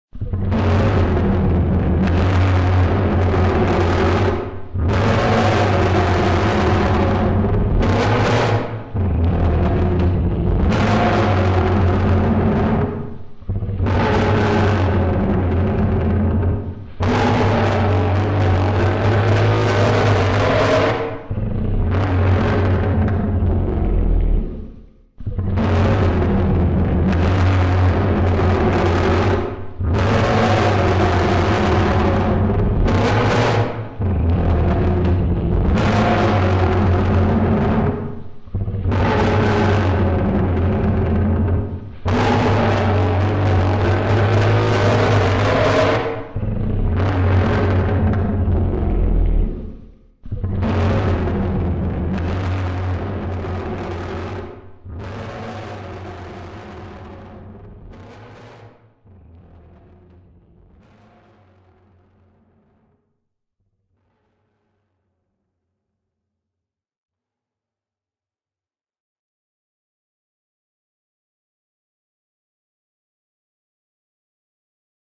Daeodon Noises
Daeodon Noises
Roars, Noises, Daeodon